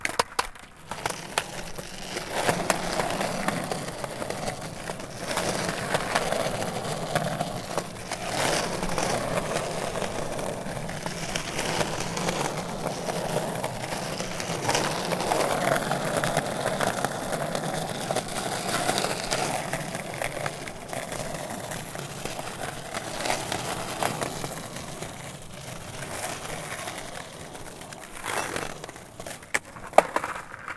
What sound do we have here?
Long-Close 3
The sound of skate boards that i take for my video project "Scate Girls".
And I never use it. So may be it was made for you guys ))
Close Ups.
creak, skate, skateboarding, riding, long, skateboard, skating, wheels, close, hard, board, wooden